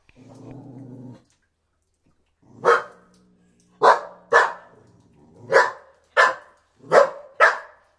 20100421.dog.vs.cat.02
cat growling and small dog barking. Sennheiser MK60 + MKH30 into Shure FP24 preamp, Olympus LS10 recorder
cat, growl, field-recording, barking, dog